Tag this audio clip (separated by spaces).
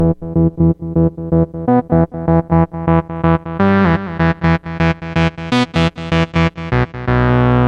loop techno 125bpm synth Lead